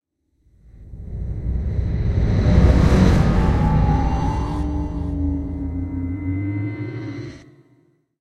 Sci-fi sound effects (4)
Sound design elements.
Effects recorded from the field of the ZOOM H6 recorder,and microphone Oktava MK-012-01,and then processed.
Sound composed of several layers, and then processed with different effect plug-ins in: Cakewalk by BandLab, Pro Tools First.
I use software to produce effects:
Ableton Live
VCV RACK 0.6.0
Pro Tools First
abstract, atmosphere, background, cinematic, dark, destruction, drone, futuristic, game, glitch, hit, horror, impact, metal, metalic, morph, moves, noise, opening, rise, scary, Sci-fi, stinger, transformation, transformer, transition, woosh